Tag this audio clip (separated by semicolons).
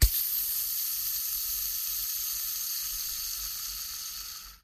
fidget; hand; spin; stress; turn